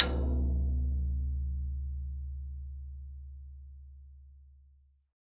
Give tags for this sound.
drum; velocity; 1-shot; multisample; tom

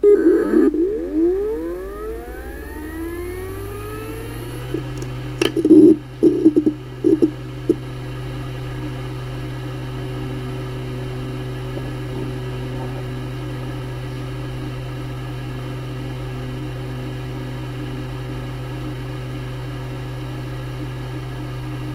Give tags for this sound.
HDD; harddrive; mono

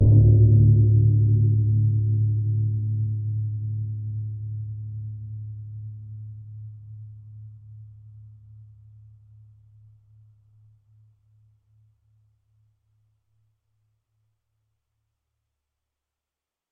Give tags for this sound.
metal; percussion; gong; ring; bell; metallic; ting; chinese; percussive; iron; hit; steel; temple; drum; clang

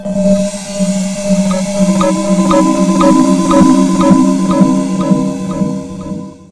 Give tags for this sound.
call; cell; mobile; ring; signal

